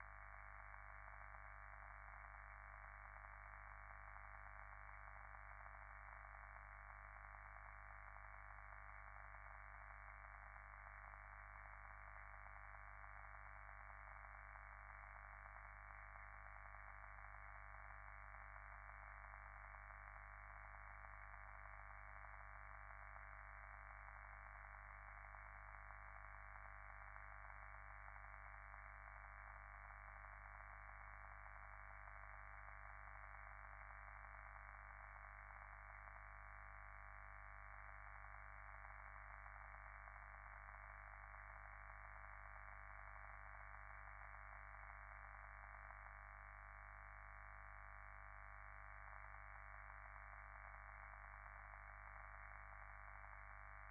Noise filtered midband
Filtered noise 50Hz overtones and some other things going on